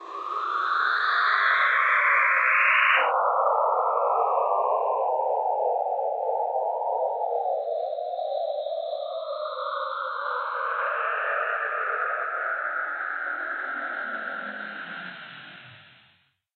an abstract Fourier sample